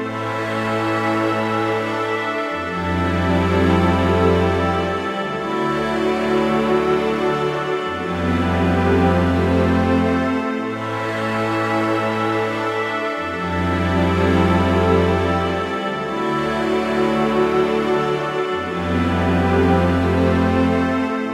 King's Mountain Strings [90bpm] [A]
movie, orchestra, moody, A, bpm, strings, 90, film, cinematic, slow